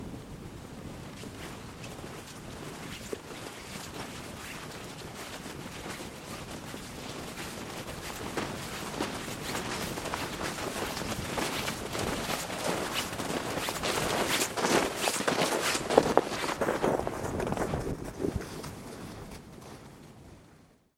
footsteps boots packed snow approach and walk past